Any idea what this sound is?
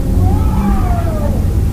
newjersey OC wonderwo loopmono
Loopable snippets of boardwalk and various other Ocean City noises.
ocean-city, field-recording, loop, monophonic